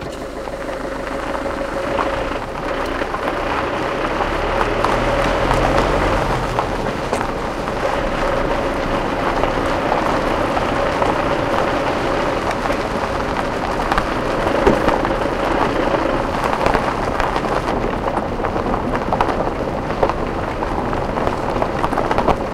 20061224.car.marching
sound of a car marching on a dirt road. I recorded this attaching the microphones with a clip to the window glass, so that's what you hear from the outside.
machine, diesel, field-recording